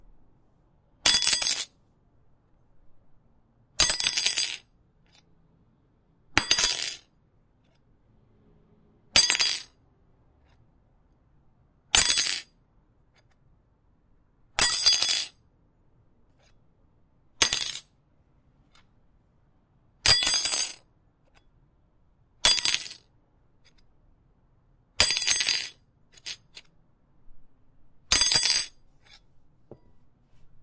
dropping spoon on linoleum